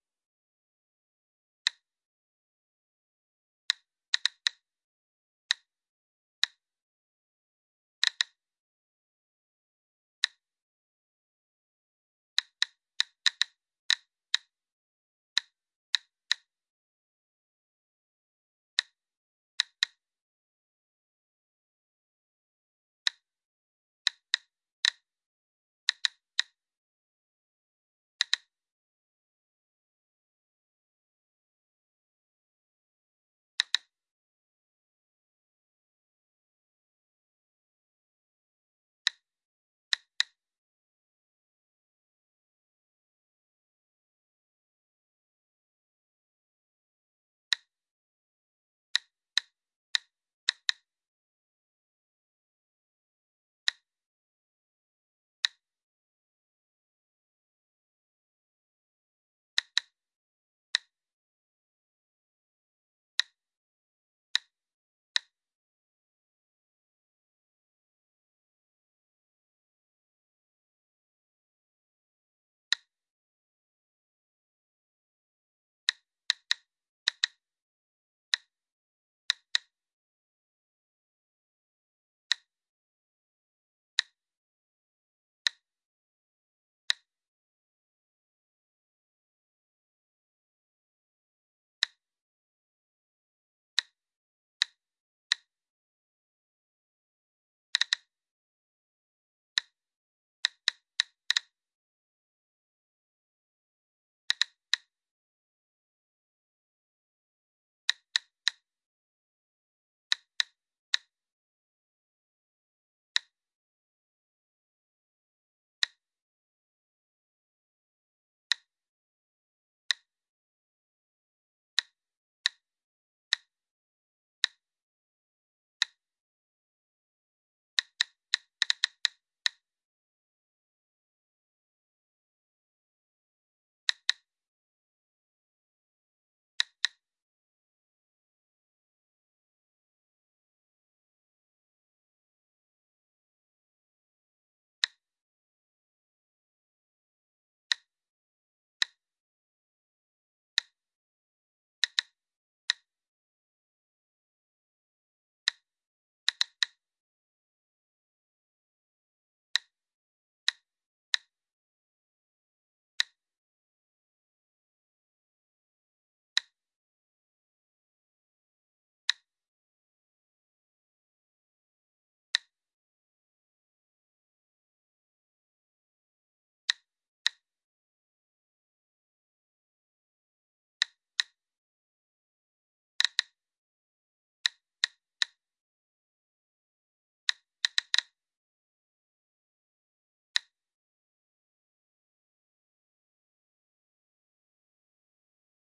Geiger Counter 0,25 µS/h
This is an edit of a recording of a real Geiger-Müller-counter, detecting normal background radiation. The reading of the Geiger-Müller-Counter was averaging at around 0,13 µS/hour (read: "micro-sieverts per hour"). The recording was then cut in the middle and overlayed with itself, to create the sound the Geiger-Müller-counter would produce when reading 0,25 µS/h. This reading still would not be dangerous.
The recording was taken with two small-diaphragm condenser microphones in XY-configuration. The recorded signal was processed with a noise gate, to eliminate background hiss. No further processing was applied.
alpha, background-radiation, beta, clicks, gamma, geiger, geiger-counter, geiger-muller-counter, instrument, nuclear, nuclear-power-plant, physics, power-plant, radiation, x-ray